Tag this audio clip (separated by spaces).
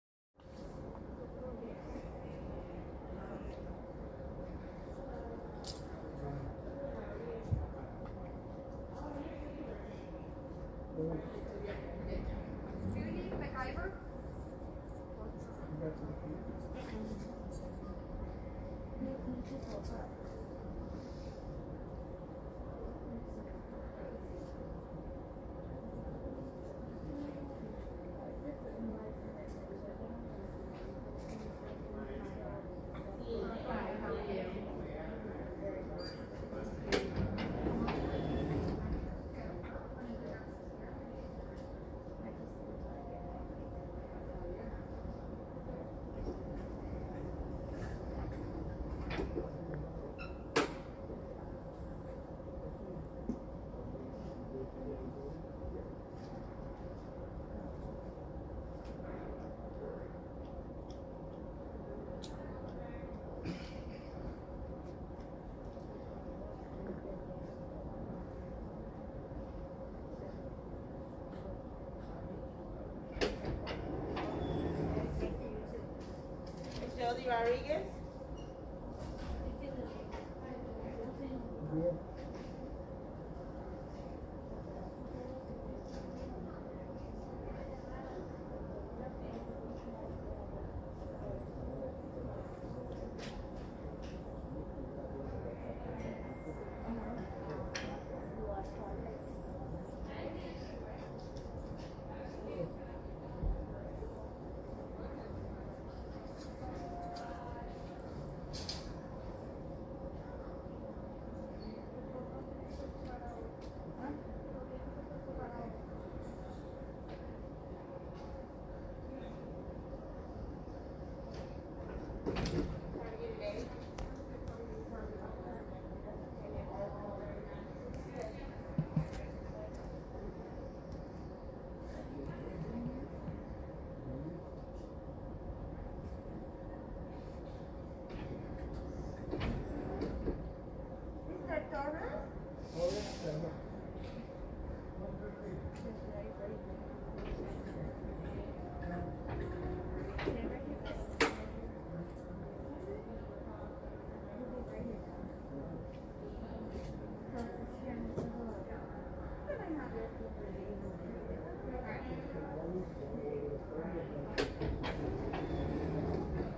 atmosphere background background-sound Hospital